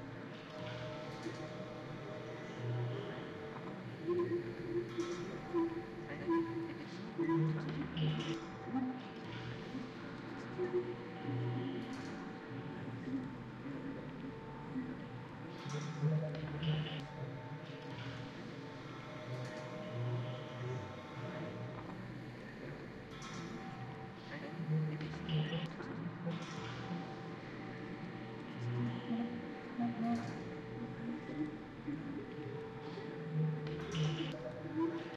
Sound of a fictional hall full of noises, voices, metal strokes and a subtile activity.